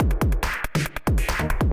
acidized beats with fx
acidized, beats, fx